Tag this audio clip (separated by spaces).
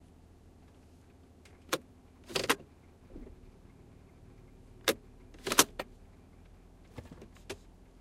driving-car park-drive shifting-car